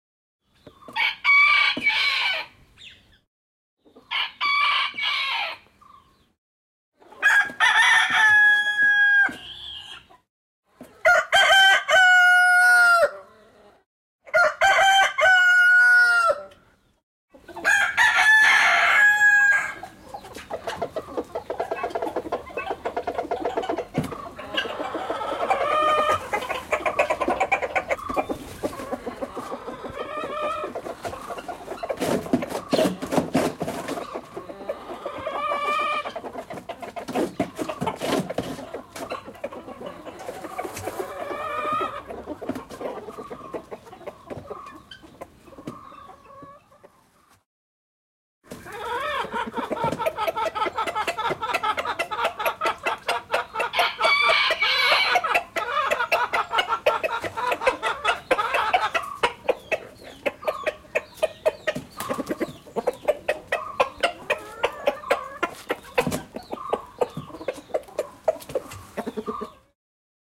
chicken shed 7 files stiched
7 takes from the chicken pen.
Field-Recording, Birds